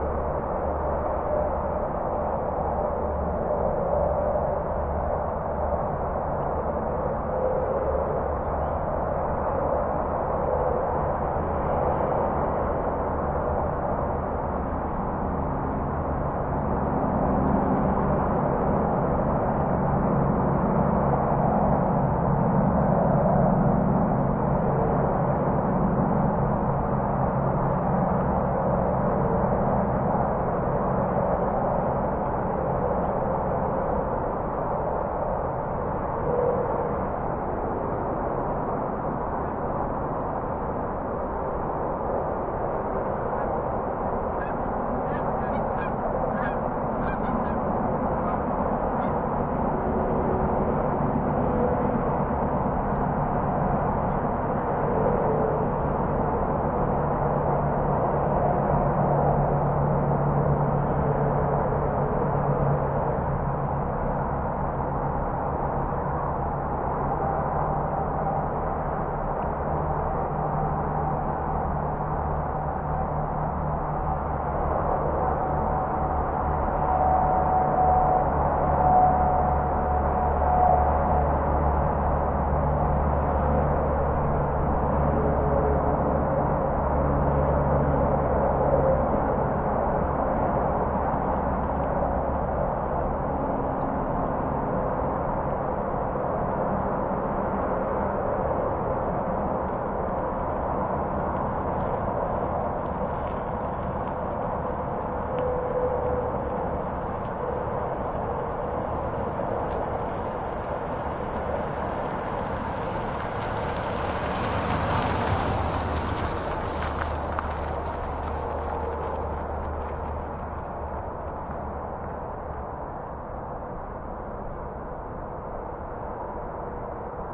skyline highway traffic distant far or nearby haze from campground with occasional slow car pass by +airplane pass overhead also creepy and GEESE
campground, distant, far, haze, highway, skyline, traffic